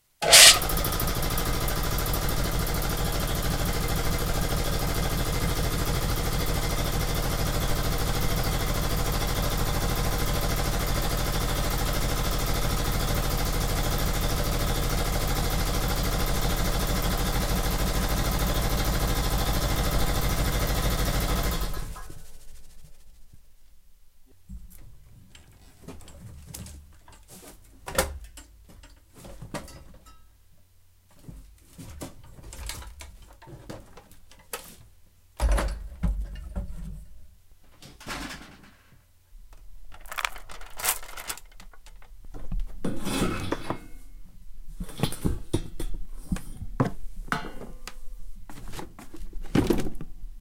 mrecord19 compressor edit
Air compressor running and shutting down.
air, compressor